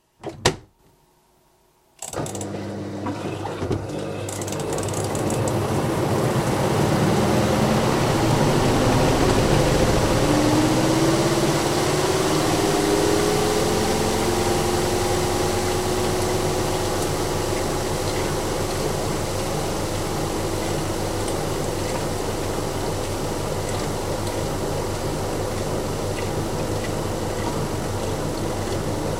Washing Machine 8 Spin Cycle 2
Washing, domestic, spin, drain, Home, bathroom, spinning, drying, Machine, dripping, Room, kitchen, drip, sink, bath, water, wash, running, faucet, tap, mechanical